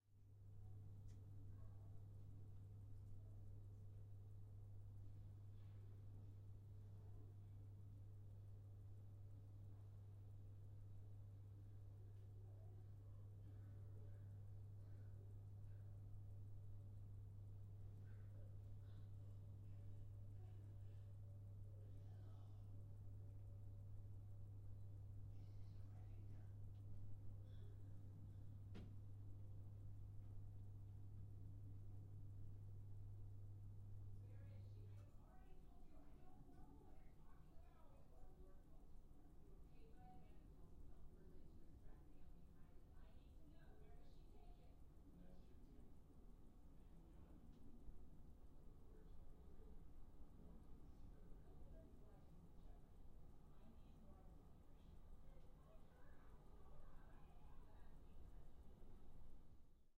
Room noise
Simple recording of the atmosphere in my house, hope it's useful to you.
Recorded with Rode NT-1
ambiance, ambience, ambient, atmo, atmos, atmosphere, background, background-sound, general-noise, noise, room, sound, soundscape